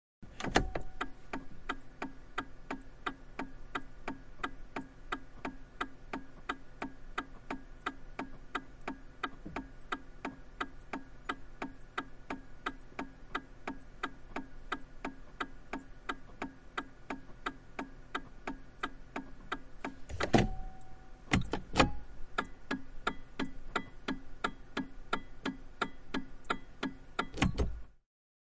Saab 9000 turbo, vm 1993. Suuntavilkku päälle sisällä autossa, naksuttaa eri suuntiin, pois päältä.
(Saab 9000 CSE, 2 lit.).
Paikka/Place: Suomi / Finland / Vihti
Aika/Date: 15.10.1993